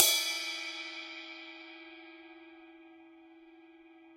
CC17-ZAMThn-Bl~v02
A 1-shot sample taken of a 17-inch diameter Zildjian Medium Thin Crash cymbal, recorded with an MXL 603 close-mic and two Peavey electret condenser microphones in an XY pair. The cymbal has a hairline crack beneath the bell region, which mostly only affects the sound when the edge is crashed at high velocities. The files are all 200,000 samples in length, and crossfade-looped with the loop range [150,000...199,999]. Just enable looping, set the sample player's sustain parameter to 0% and use the decay and/or release parameter to fade the cymbal out to taste.
Notes for samples in this pack:
Playing style:
Bl = Bell Strike
Bw = Bow Strike
Ed = Edge Strike